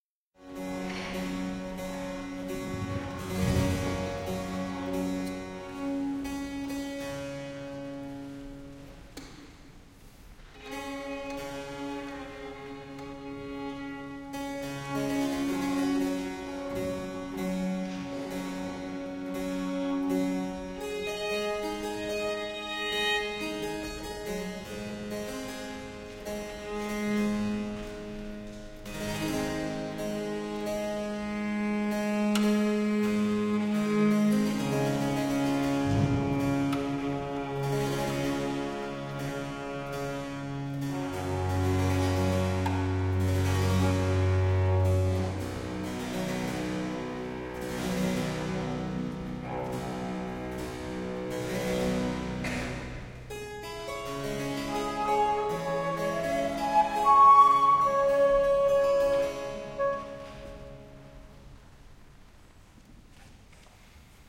Early music group tuning their instruments en Santa Teresa Church in San Sebastian, Basque Country
MUSIC, TUNING, EARLY